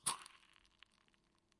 Match extinguish-2
Match extinguished in water, taken with zoom H2N.
burn, burning, combustion, crackle, fire, flame, flames, match, matches, sparks